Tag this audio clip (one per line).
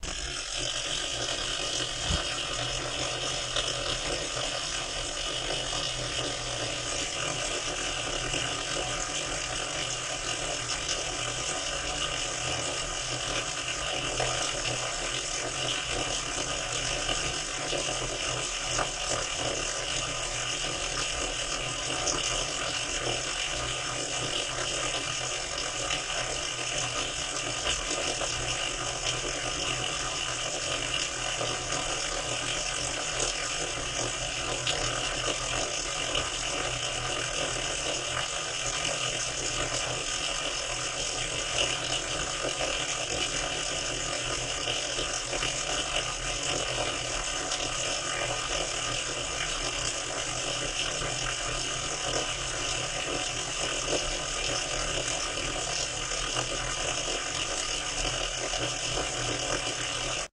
ambient
field-recording
movie-sound
pipe
water-spring
water-tank